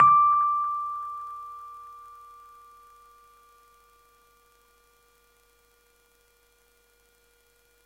just the single note. no effect.
note, rhodes